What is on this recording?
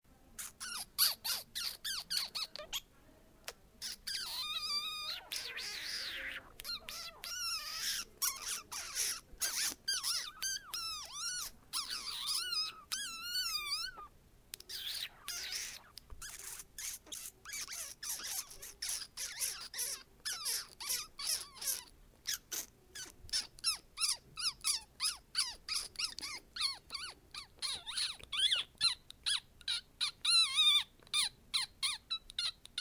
Chillidos Animal
Howl, Rodent, Yell